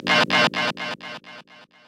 I timestretched and waveshaped a kick and got this out of it. Enjoy!